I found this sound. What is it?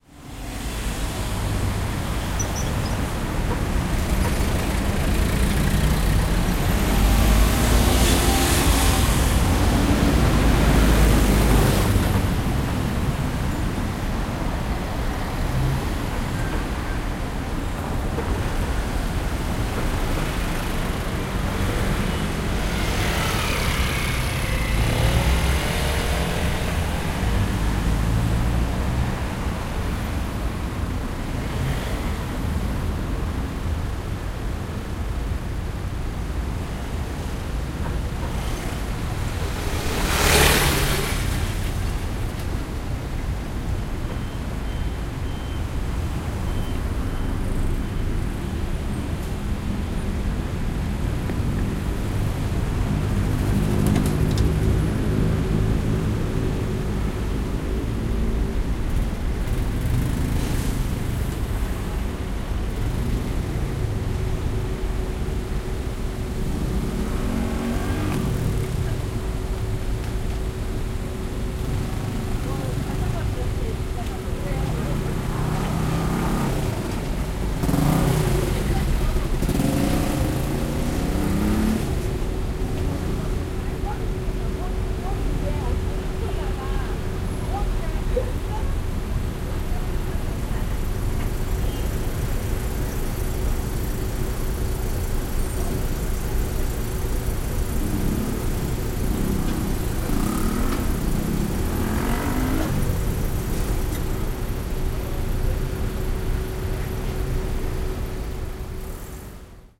0347 Traffic at Sindang 2
Traffic at Sindang. Obstacle in the road in the background. Motorbike, cars, car engine.
20120629
field-recording, seoul, korea, engine, motorbike, traffic, cars